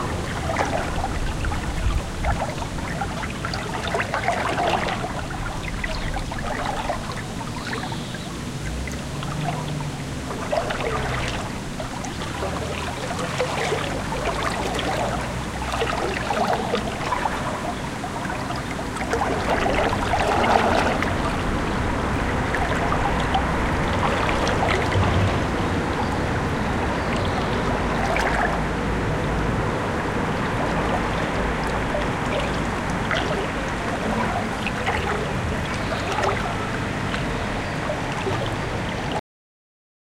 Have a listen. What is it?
Boat stage recorded on the bank of the river Regnitz, in the city of Bamberg/Germany, July 2010